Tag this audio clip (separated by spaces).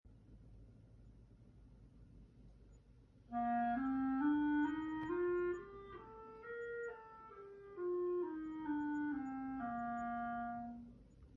instruments music